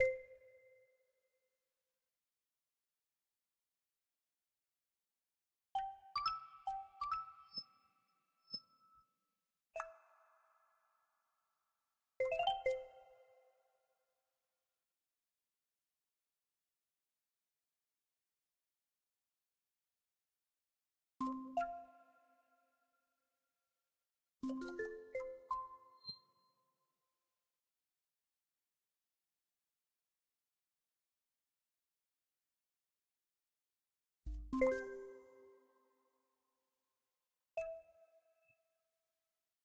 FX Made up mobile phone alerts Samsung iphone NATURAL
Samsung or iphone style alert tones, made by converting existing mobile phone tones to midi and then playing with a synth (omnisphere) and changing the odd note.
alert, bing, buzz, samsung, iphone, bong, mobile, telephone, phone